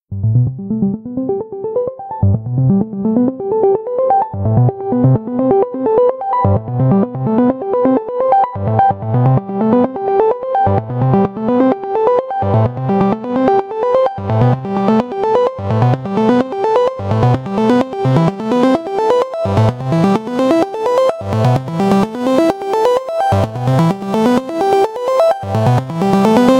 Good for any edm type of music. This version has sidechain and frequency modulation in it and it also has delay, reverb and some eq-ing.
128, bpm, club, edm, electro, house, loop, rave, synth, techno, trance